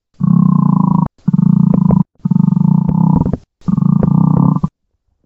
I created the raw of the sound simply by doing the best I could do was with my mouth. Then I used DSP, in NERO Sound Pad, mainlt joddling with amplification, speed, EQ and bandpass filtee.